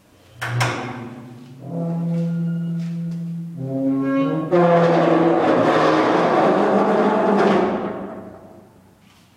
Heavy metal door in a bunker with super squeaky hinges. Produces a low metallic groan sound